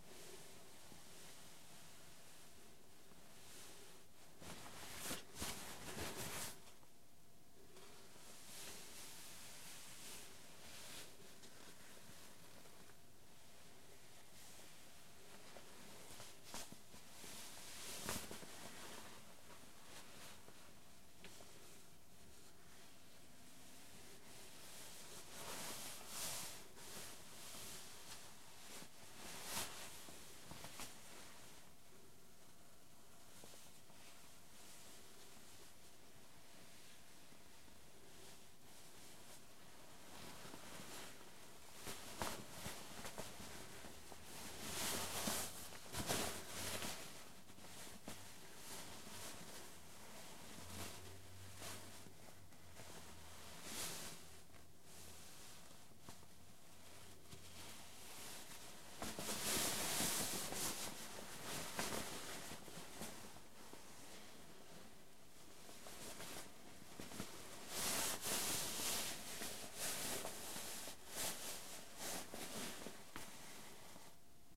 Mono recording of rustling fabric.
fabric, foley, mono, movement, rustle, shirt